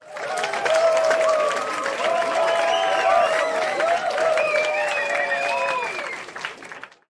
Crowd cheering at Talk & Play event in Berlin.
Thank you and enjoy the sound!
crowd, yay, cheer, positive, cheering, people, applause, meeting, mass